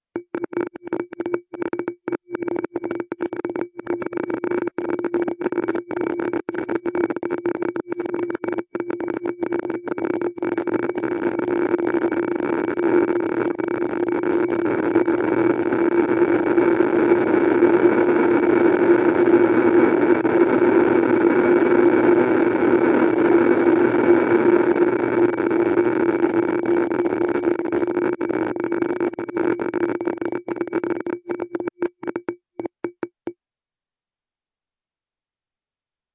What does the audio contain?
Aproaching the Radiation
Imagine you are in Pripyat entering reactor 4 holding geiger muller counter. This is what you hear.
abstract, digital, effect, electric, freaky, future, fx, glitch, lo-fi, noise, sci-fi, sfx, sound, sound-design, sounddesign, soundeffect, soundesign, strange, weird